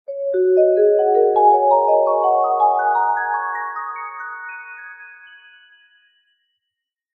[23] s-vibraphone penta up 2
Vibraphone notes I played on my Casio synth. This is a barely adjusted recording.
vibraphone, vibe, upwards, notes, pentatonic